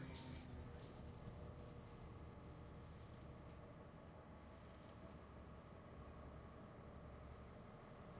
Harsh brake sounds.